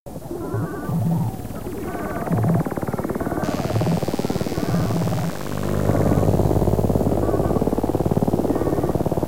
a remix of Koen's shortwave radio pack looped in reason- no fx